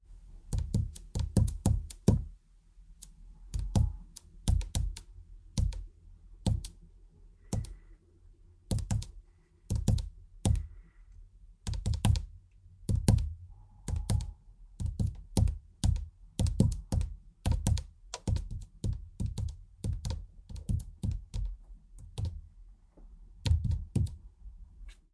tapping steering wheel with finger
Tapping on a steering wheel with finger. Inside a car.
steering, wheel, tap, tapping